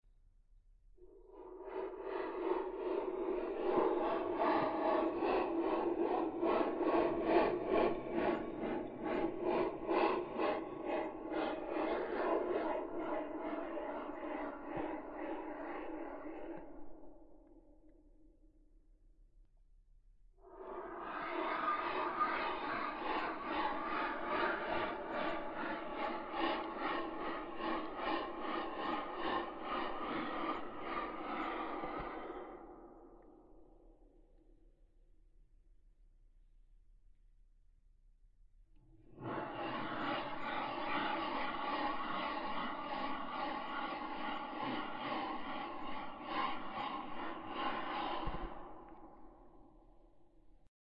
Jadeo animal
Animal's gasp (intended)